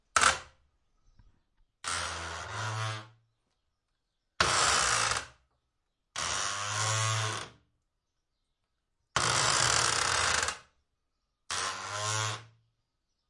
wood window shutter very stiff heavy creak on offmic
creak, shutter, stiff, window, wood